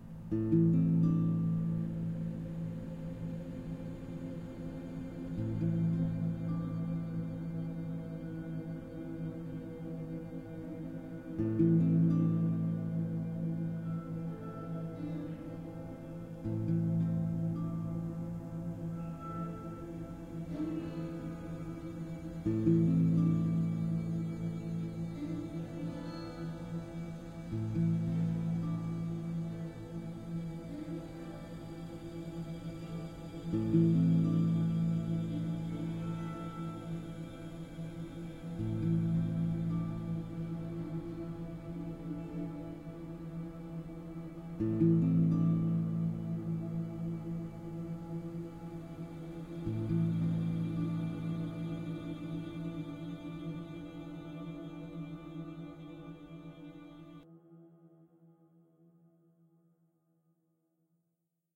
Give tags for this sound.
loop acoustic chords guitar

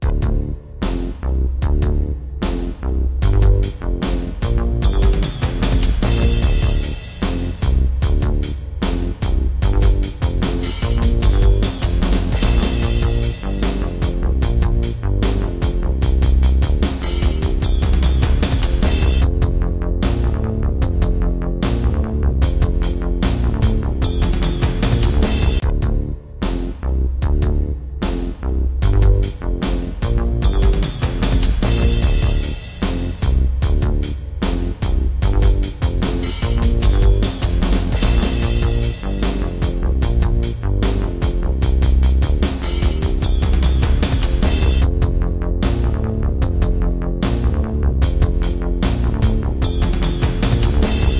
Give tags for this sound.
150 Bass BPM D-minor Drums Power Synth

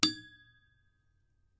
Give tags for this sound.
Foley Hit Bottle Strike Metal Thermos Impact Water

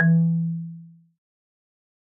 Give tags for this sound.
instrument marimba percussion wood

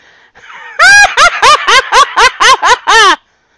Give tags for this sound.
laughter laughing laugh giggle female woman

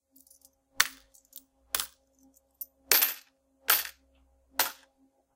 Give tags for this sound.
till money coins impact

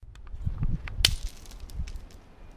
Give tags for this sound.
bottle break breaking broken crack crunch fracture glass hit shards shatter smash smashing

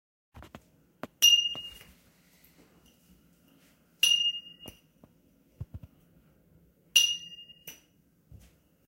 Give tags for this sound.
big coin ding flip ping pling

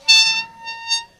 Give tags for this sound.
chirp chirrup creak creaky door fountain metal scraping scratch squeak squeaking squeaky

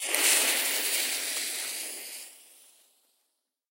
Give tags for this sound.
fuse hiss stereo

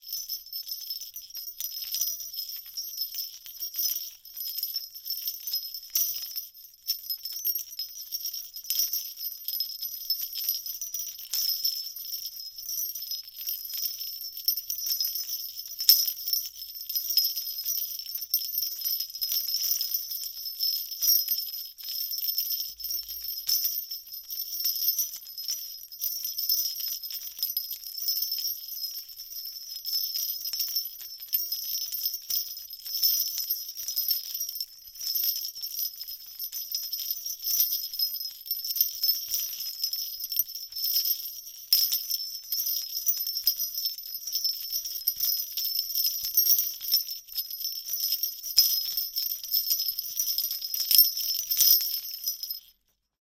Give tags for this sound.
ringing jingle endless musical jingle-bell bell

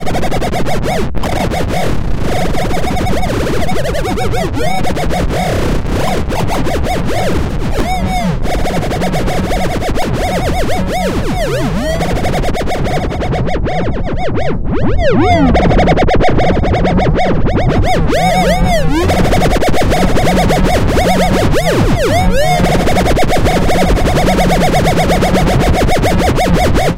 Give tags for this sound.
sound-effect warp oscillator sound-design wobble sweep synthesis distorted spin fx sci-fi spinning sounddesign glitchmachines scope modulation distort sfx retro laser synth lfo soundeffect ray oscillation sine wobbling digital

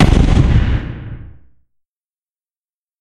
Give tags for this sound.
army attacking bang bomb boom counter-strike destruction destructive explosion explosive gun guns kaboom military shot tank tnt weapon

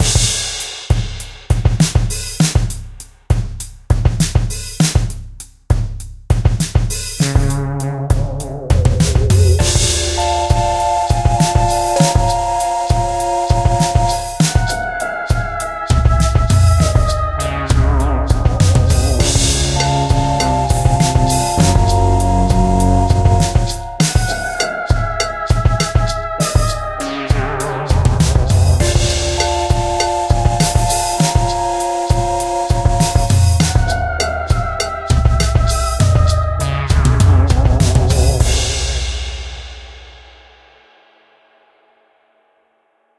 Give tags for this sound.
Alian Aliens Ambient Atmosphere Creepy Dangerous Eerie Fantasy Frightening Game Game-Creation Halloween Horror Mindblowing Mysterious Mystic Outer Pod Scary Sci-Fi Scifi Space Spaceship Spooky Strange Unknown

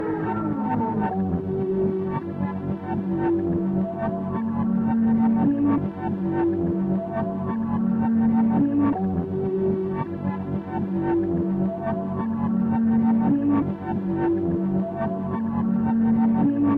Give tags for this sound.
analog synth chop reel-to-reel tape retro

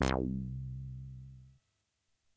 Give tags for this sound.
80s Casio HZ-600 preset sample synth

